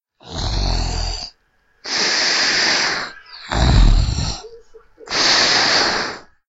Snoring. Recorded with a CA desktop microphone.